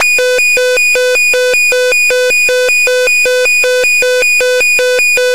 archi scifi alarm targeted 03
Science fiction alarm for being targeted by a weapon. Synthesized with KarmaFX.